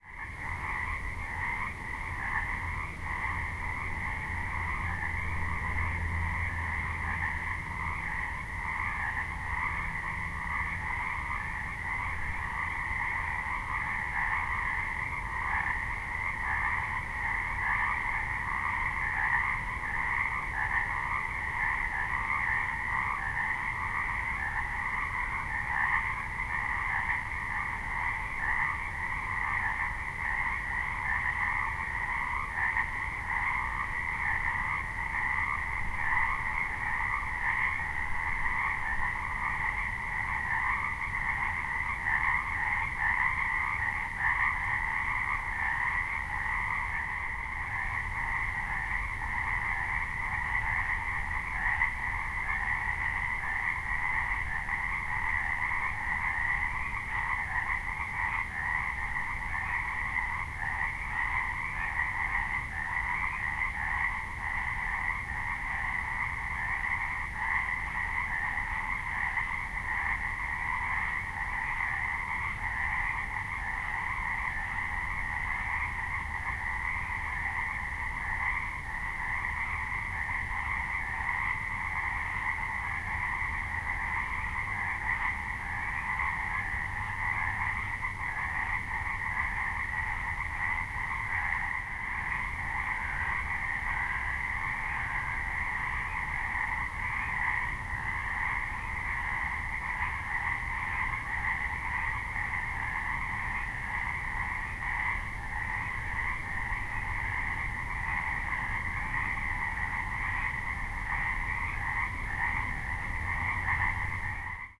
Pacific tree frogs (Pseudacris regilla) in a disused quarry. Some background noise from wind in nearby trees. Recorded with an Olympus LS-14.
pond, nature, wildlife